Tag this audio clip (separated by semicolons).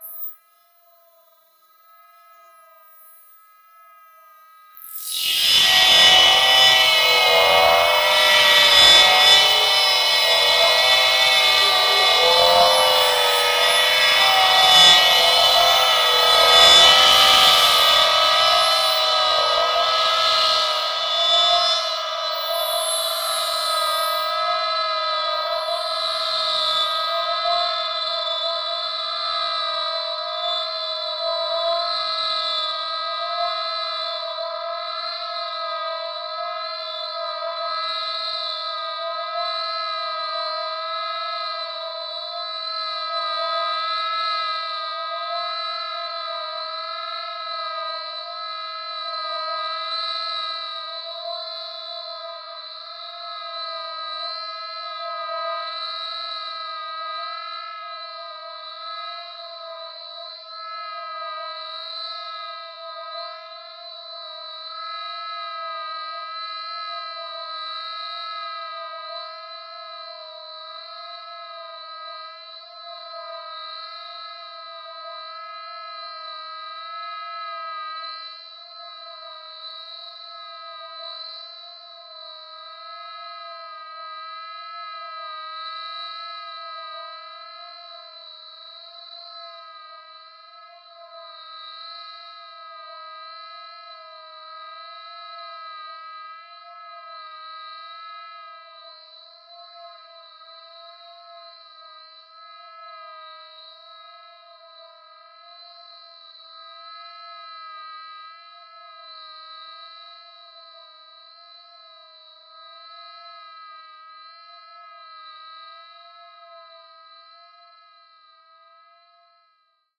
effect; space; fx